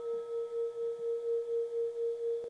faded ring loop
The sound a singing bowl makes when you run the mallet around the rim.
faded, bell, ringing, cicular